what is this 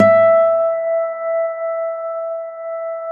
A 1-shot sample taken of harmonics of a Yamaha Eterna classical acoustic guitar, recorded with a CAD E100 microphone.
Notes for samples in this pack:
Harmonics were played at the 4th, 5th, 7th and 12th frets on each string of the instrument. Each position has 5 velocity layers per note.
Naming conventions for samples is as follows:
GtrClass-[fret position]f,[string number]s([MIDI note number])~v[velocity number 1-5]
The samples contain a crossfade-looped region at the end of each file. Just enable looping, set the sample player's sustain parameter to 0% and use the decay and/or release parameter to fade the sample out as needed.
Loop regions are as follows:
[150,000-199,999]:
GtClHrm-04f,4s(78)
GtClHrm-04f,5s(73)
GtClHrm-04f,6s(68)
GtClHrm-05f,3s(79)
GtClHrm-05f,4s(74)
GtClHrm-05f,5s(69)
GtClHrm-05f,6s(64)
GtClHrm-07f,3s(74)
GtClHrm-07f,4s(69)
GtClHrm-07f,5s(64)
GtClHrm-07f,6s(59)
GtClHrm-12f,4s(62)
GtClHrm-12f,5s(57)
GtClHrm-12f,6s(52)
[100,000-149,999]:
GtClHrm-04f,3s(83)
1-shot, acoustic, guitar, multisample, velocity